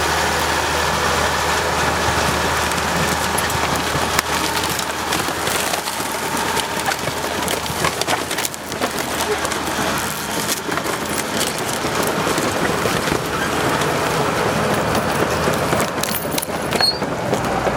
tractor-ladnfill-crush
Sound of tractor on landfill. Noise of engine and crushing garbage. Recorded on internal Canon 5D Mark 2 microphone. No post processing.
crush, engine, environmental, equipment, garbage, hum, industrial, landfill, lift, machine, machinery, mechanical, motor, noise, tractor